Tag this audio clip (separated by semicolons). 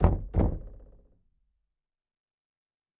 wood; percussion; percussive; door; knock; closed; bang; tap; wooden; hit